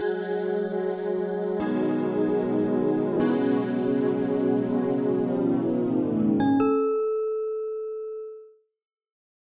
Startup music like a console of some type. Inspired by the unused Xbox One startup that is very relaxing but unfortunately it was scrapped.